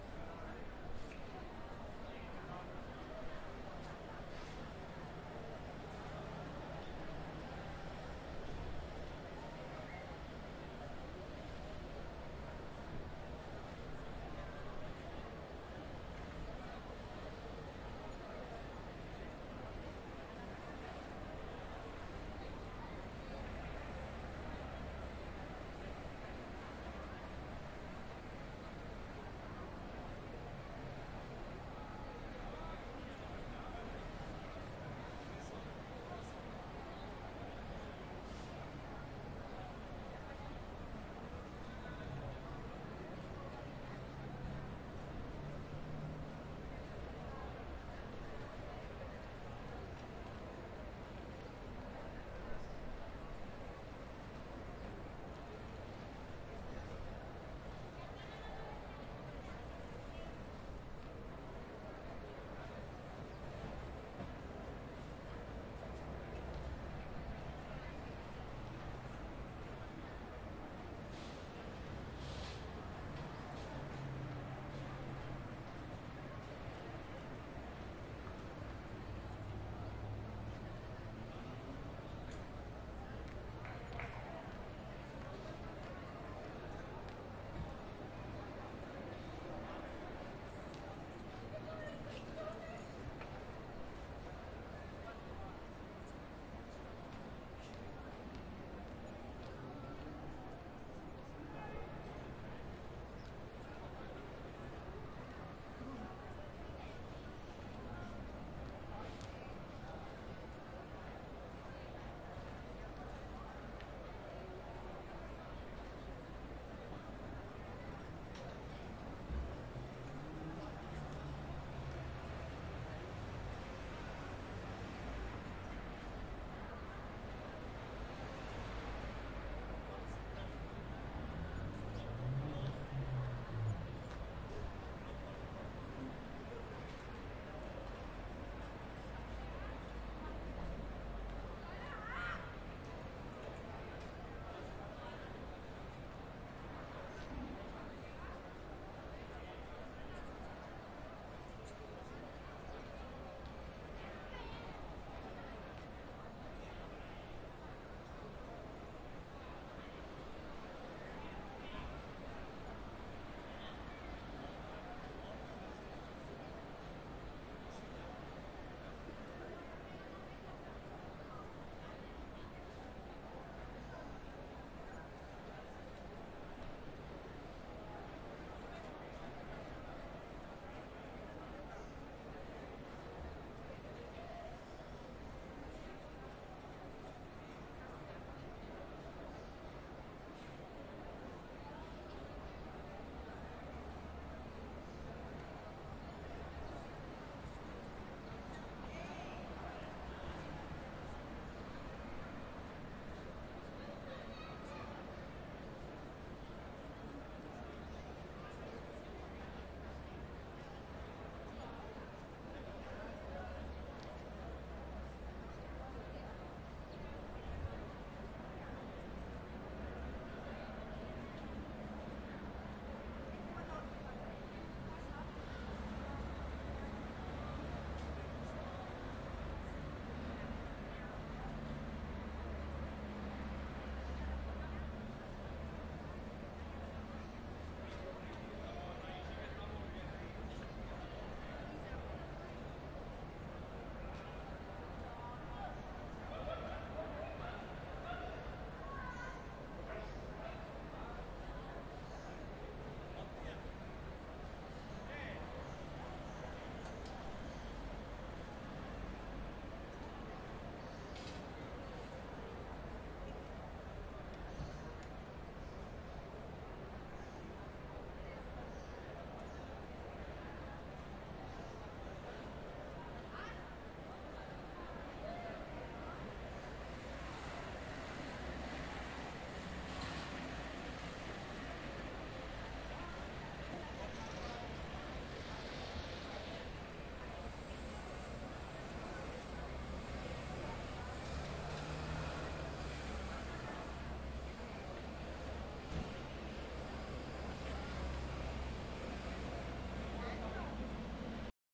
Street market 1
These audios have been recorded in a street market at Gandía (Valencia). They have been recorded at different distances and locations within the market. The action takes place on midday.
selling, market, crowd, talking, fuss, people, street, Gandia, background-talking, Spain